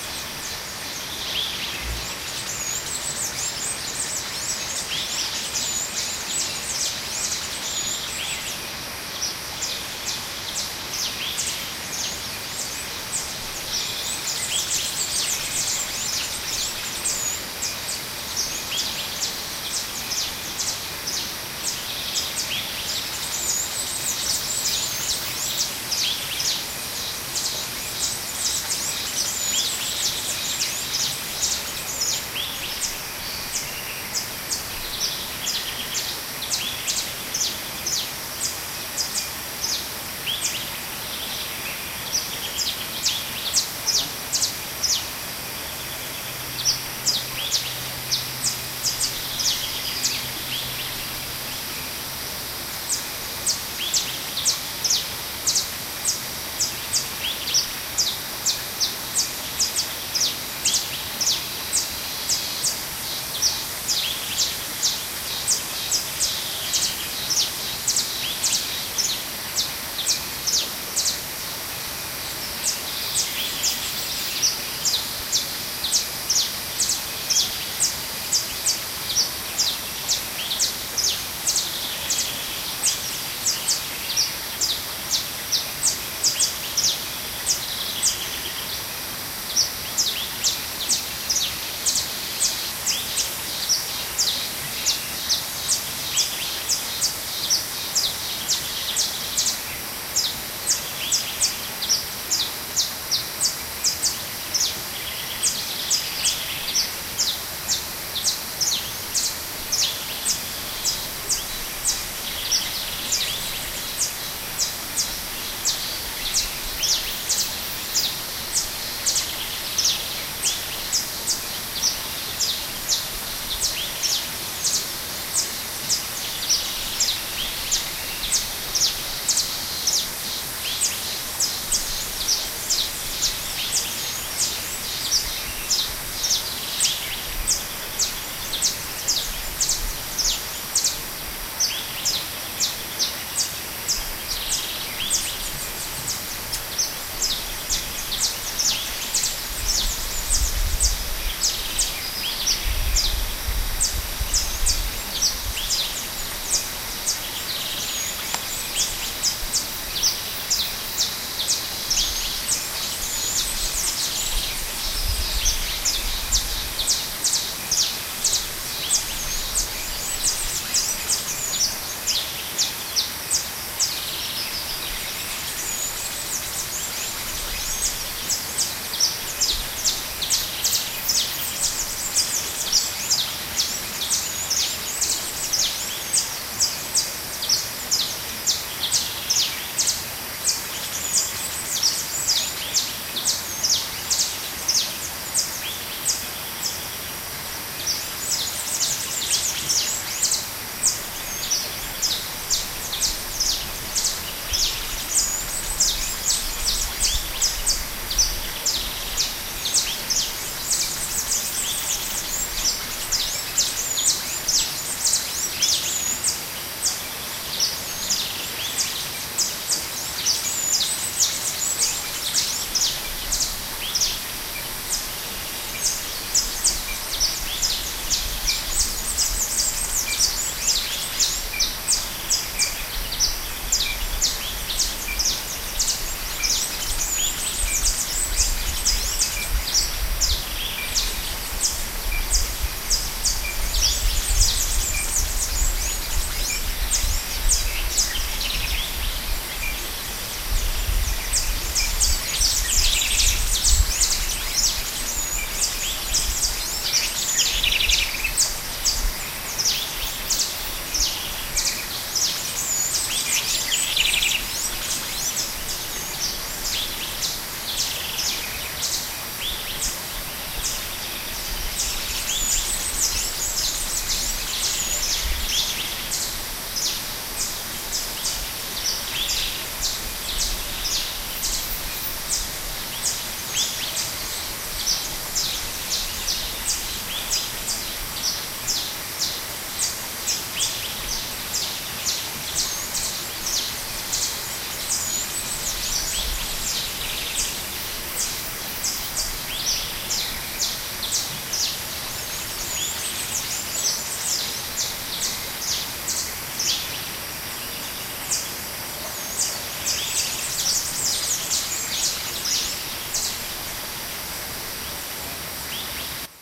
at night in the jungle - little river in background 2
same place, same night, but with less cicadas then the other recording.
field-recording, woods